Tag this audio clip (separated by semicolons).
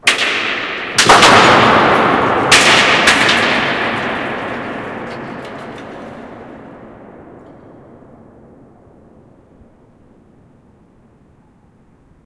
silos; 20m; riverbed; Renesans; reverb; gruz; hall; punch; bigsound; monster